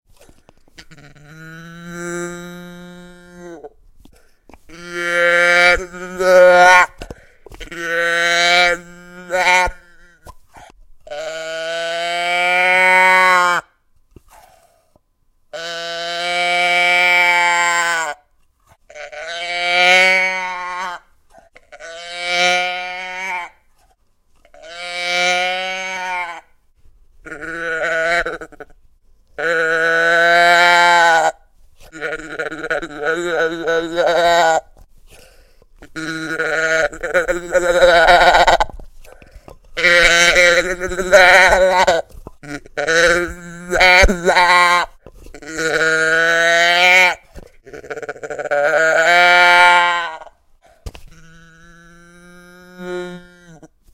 I played with a cow mooing box, a little cylinder shape with a piston and a membrane. Choose the right sound snippet for your purpose.
box, calf, cartoon, funny, animal, cow, sheep, call